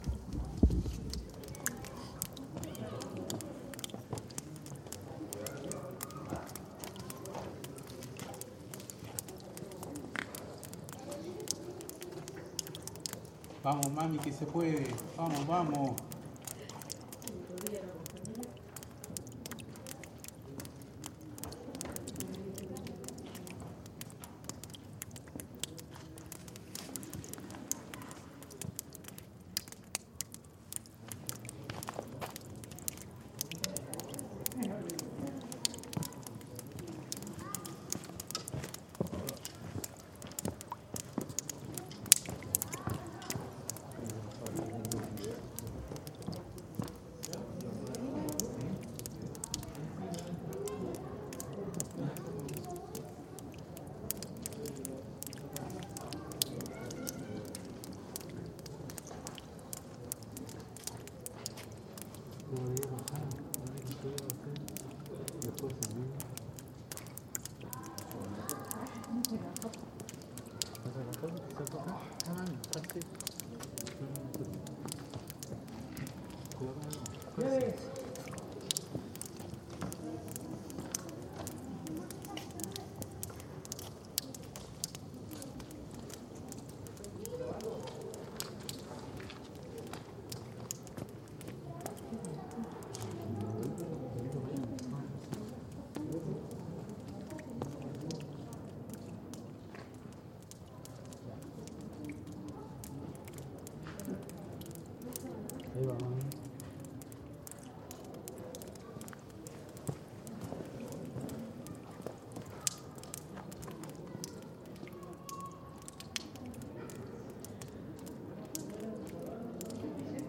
Tourists and dripping water inside a cave
At the Cueva del Milodón. Recorded with a NTG3 on a MixPre6.